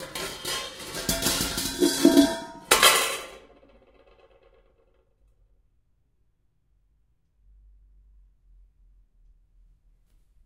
pots n pans 14
pots and pans banging around in a kitchen
recorded on 10 September 2009 using a Zoom H4 recorder